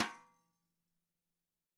Drums Hit With Whisk
Drums, Hit, With, Whisk